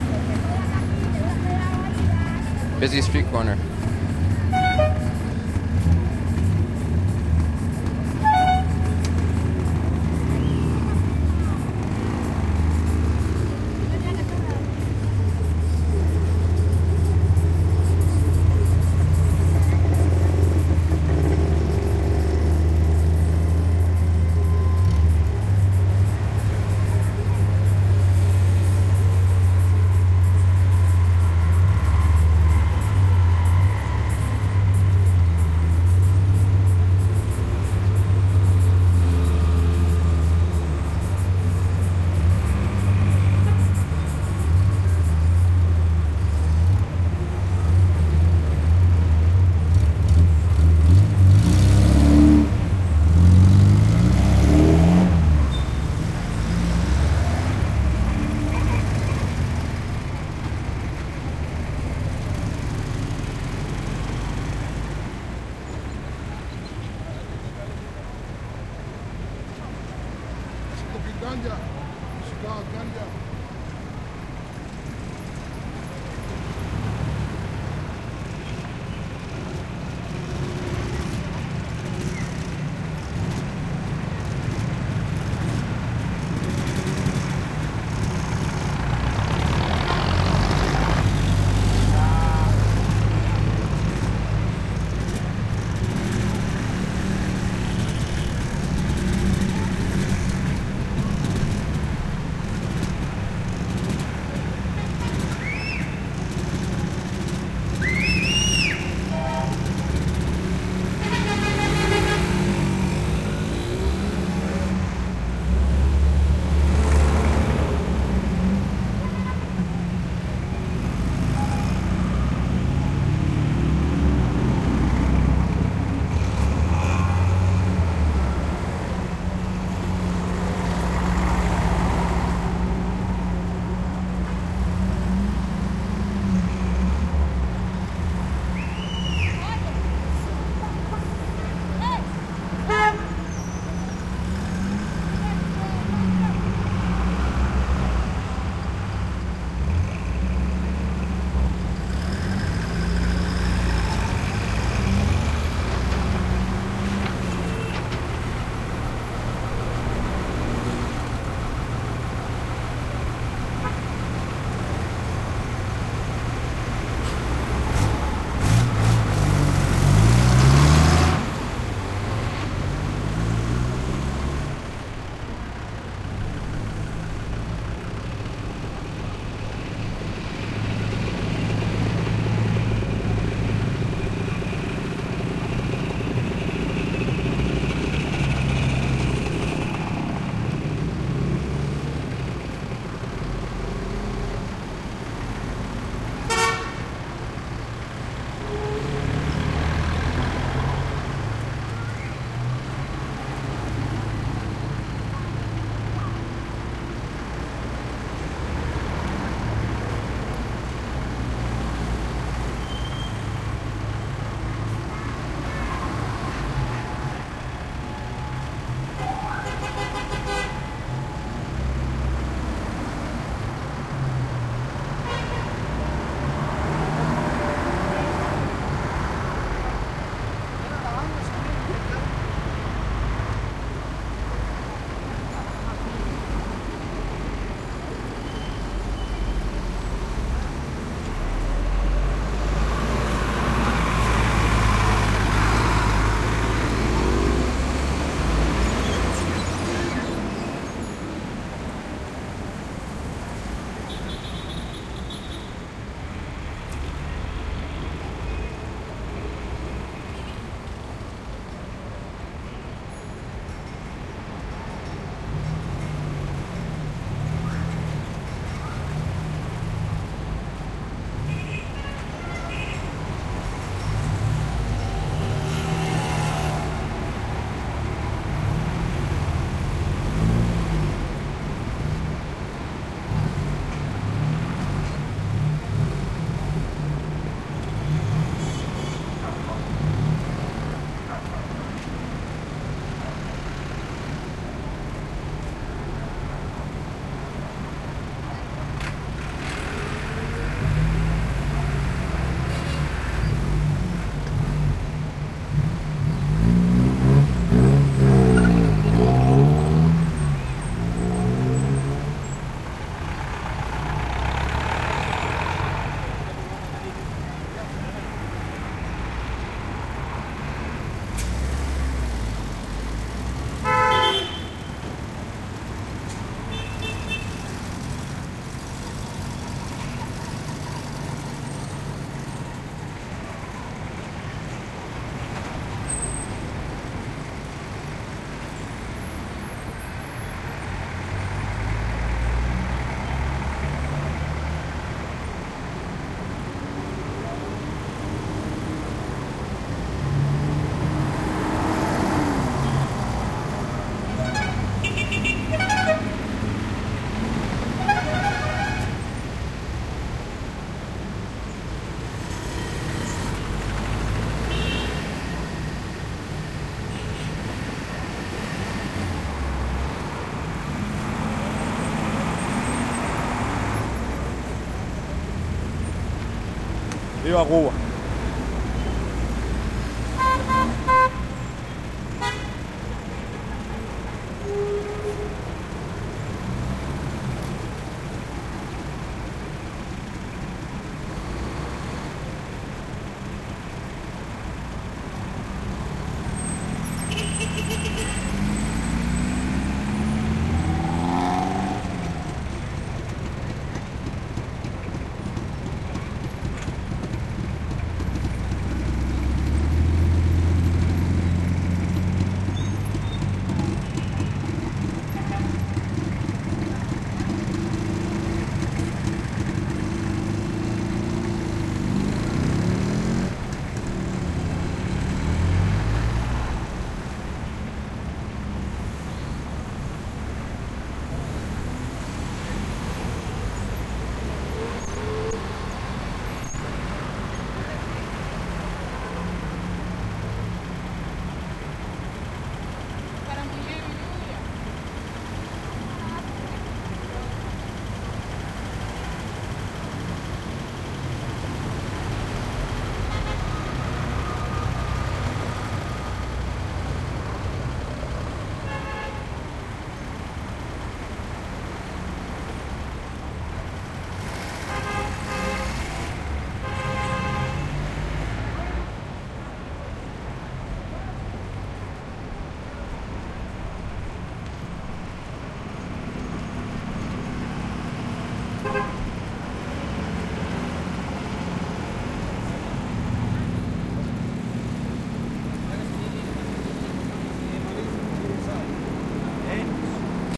traffic heavy throaty busy intersection old cars pass and music Havana, Cuba 2008